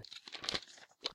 One page turn. Recorded a piece of paper.
page, reading, flick, book, turn